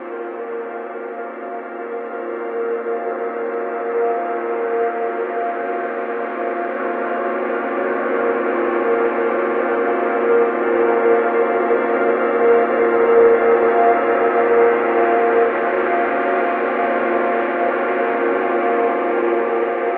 Analogue Pt1 20-3
mid frequency bandpassed with the beautifully slow LFO of the RS3
rusty, old, pad, resonator-rs3, slow-lfo, texture